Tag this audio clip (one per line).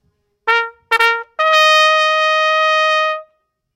bugle
call
trumpet